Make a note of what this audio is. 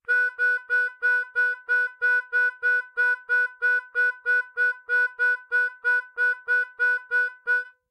3 Hole Draw Triplets Harmonica Hohner Special 20 01
I played a three hole draw in triplets.
Harmonica, hole, Single, Triplets